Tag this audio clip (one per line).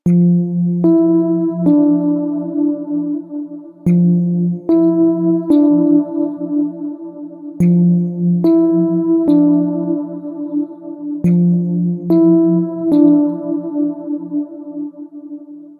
ambiance
ambience
ambient
atmo
atmos
atmosphere
atmospheric
background
background-sound
general-noise
soundscape